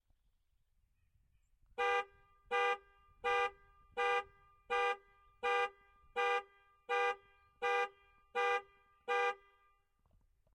Car Alarm recorded on a Tascam with an Azden shotgun mic, with wind cover. Recorded about 4-6 feet from the front of the vehicle. Audio is straight outta recorded, no post production.